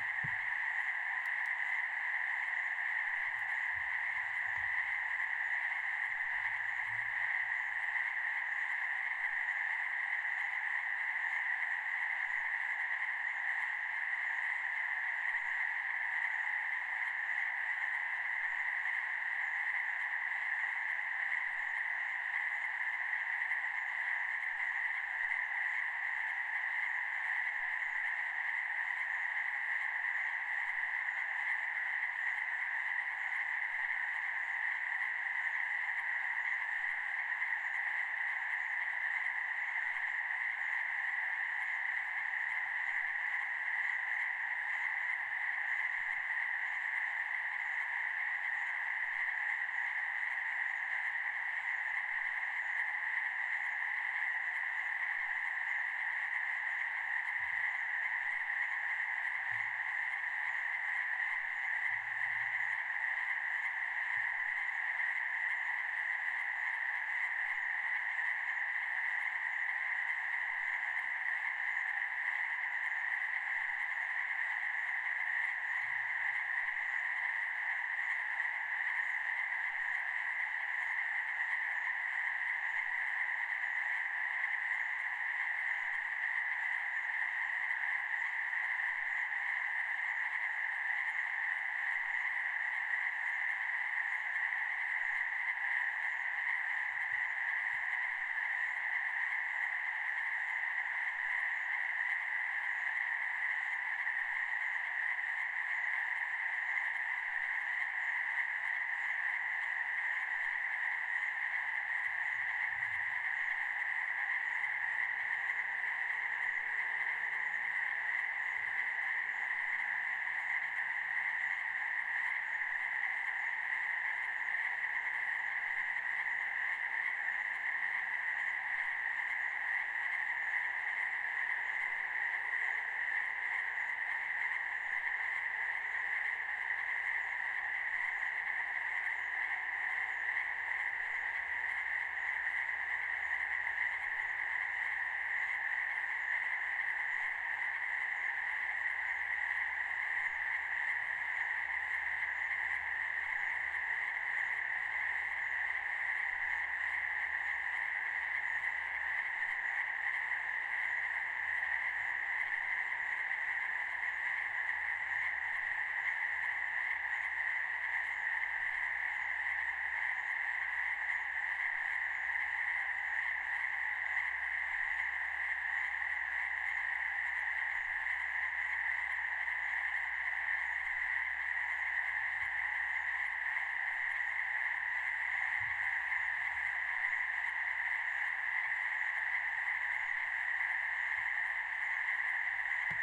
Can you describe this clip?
An evening recording on a Zoom H4 of a pond filled with frogs. Recorded in the spring, in the Methow Valley, Washington State, USA.